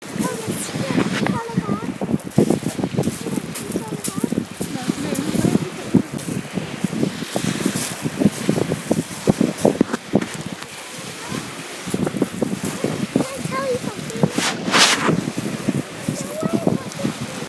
Mysounds gwaetoy sea and wind
Recordings made on a sound walk near Lake Geneva
nature, Switzerland, TCR